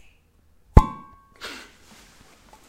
Spade Hitting Head
A spade hitting someones head.